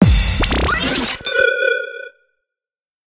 Radio Imaging Element
Sound Design Studio for Animation, GroundBIRD, Sheffield.